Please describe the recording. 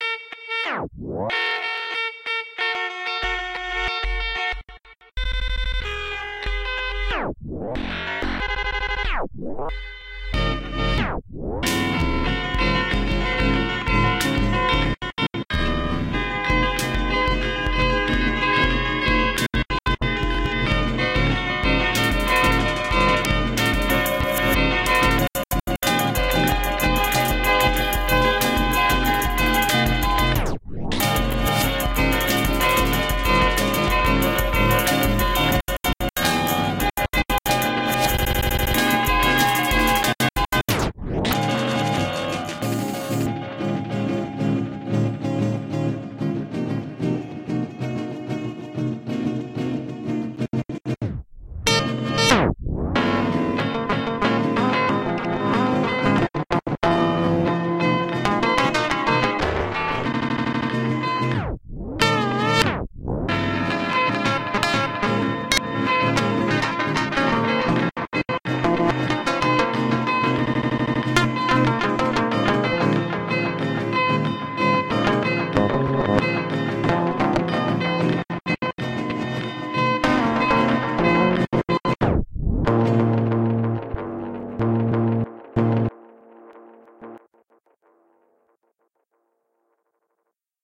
OHC 498 - Glitched Guitars
Glitch Guitar Beat
dBlue, Glitch, Guitar